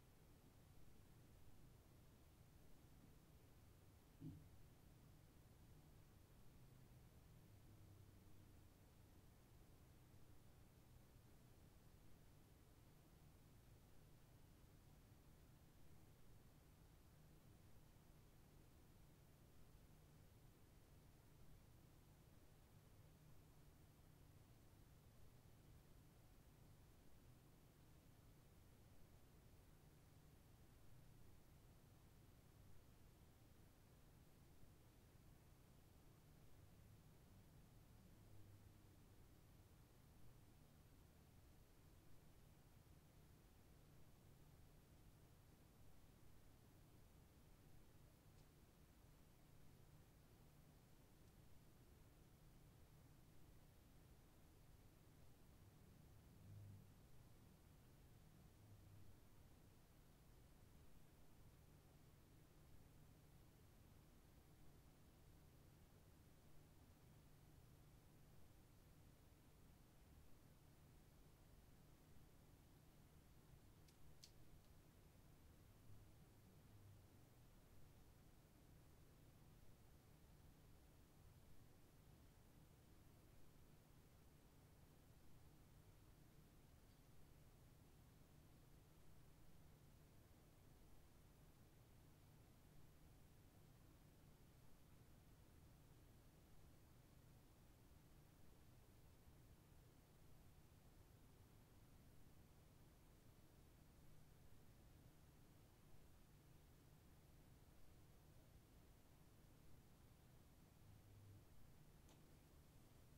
lino silence
Room tone for Footstep linoleum sound pack.
room-tone, roomtone, silence